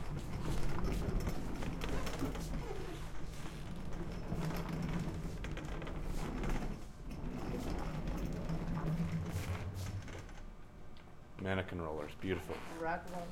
Seamstress' Studio Rack Rollers
Recorded at Suzana's lovely studio, her machines and miscellaneous sounds from her workspace.
Seamstress, Studio, Rollerswav